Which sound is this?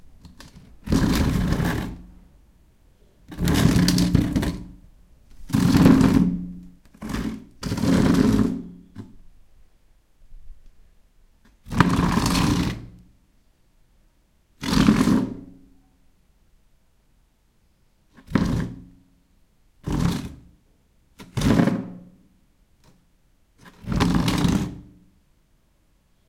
chair plastic drag across stone or concrete floor
concrete, floor, stone, plastic, chair, or, drag, across